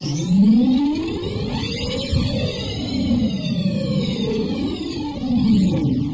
Sounds of bigger and smaller spaceships and other sounds very common in airless Space.
How I made them:
Rubbing different things on different surfaces in front of 2 x AKG S1000, then processing them with the free Kjearhus plugins and some guitaramp simulators.